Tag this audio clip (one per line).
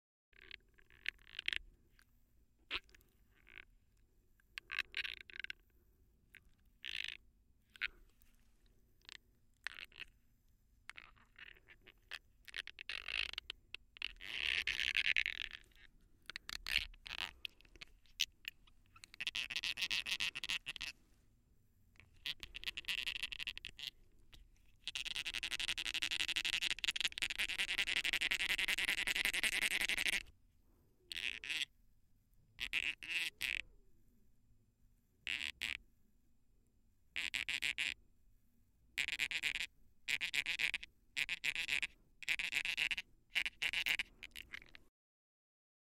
squeaky
chewing-pacifier
squeaks
squeeze
squeeking
pacifier
squeaking
adult-chewing